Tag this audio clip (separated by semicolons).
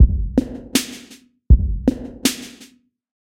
space; reverb; sound; Analog; soft; 3d; loop; dub; vintage; 60-bpm; deep; ambiental